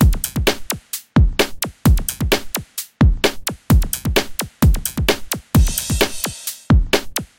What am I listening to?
Electro Fab 002
Produced for music as main beat.
drum, loops, industrial, electro